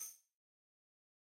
tambourine hit 11
10 inch goatskin tambourine with single row of nickel-silver jingles recorded using a combination of direct and overhead mics. No processing has been done to the samples beyond mixing the mic sources.
tambourine, stereo, skin, instrument, dry, multi, real, acoustic, velocity